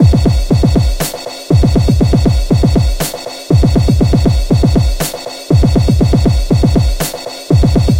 noisy dubstep loop
just a short drum loop :)
drum, 120bpm, drums, dubstep, loop, synthesizer